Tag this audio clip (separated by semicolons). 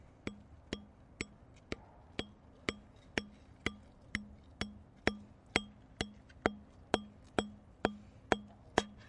bottle; glass; tree; wood